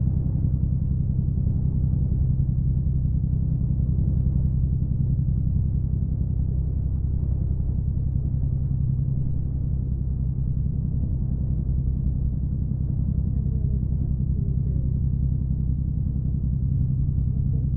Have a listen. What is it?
boat motor bass element India
bass, boat, element, India, motor